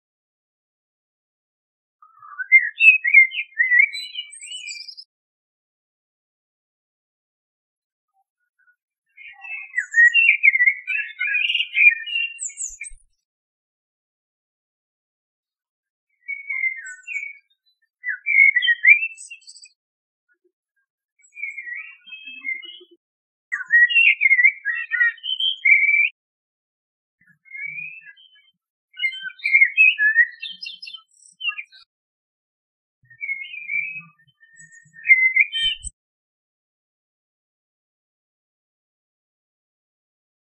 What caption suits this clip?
recording of blackbird song on my sony minidisc MZ RH910 in July of 2009 in the local park. I think it is mainly blackbirds giving it loads here. I cleaned up the surrounding intrusive ambiance a little too hard in this case, hence a strange burbling noticable instead of sounds of passing planes, noisy people- argh!